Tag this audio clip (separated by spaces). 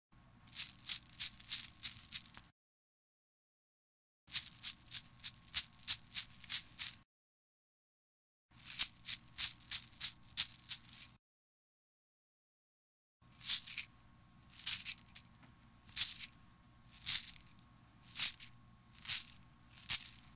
santa shake christmas music bells clause claus